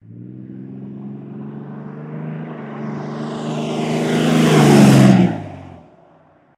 Truck-Diesel 10dodge flyby